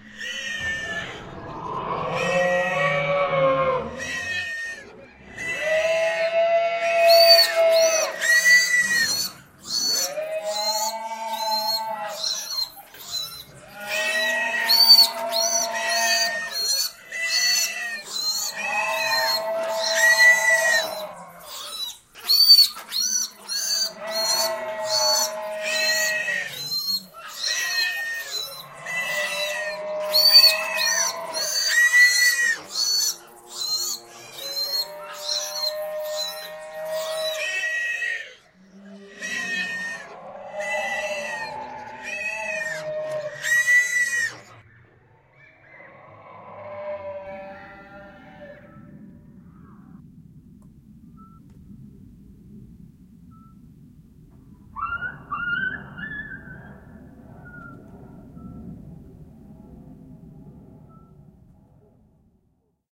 little house of pain
My reconstruction of the sound coming out from the island of Doctor Moreau (a tribute to H.G.
with several pitch and pan changes.
animal-experimentation, animal-welfare, Campus-Gutenberg, vivisection, animal-rights, grotesque, pain, death, scream, Dr-Moreau, suffering, horror, cruelty, Medical-science